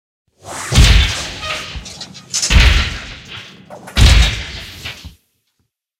Rusty Mech Stomp SFX
There was a foley (of the then cranky closet of mine I was fiddling with) lying around in my hard drive I recorded some time ago. I pitched it down several times, stacked up in multiple layers & went on to process the layers individually.